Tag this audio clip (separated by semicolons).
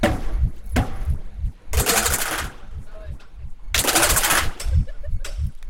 fence; keys; laser; percussion; shoot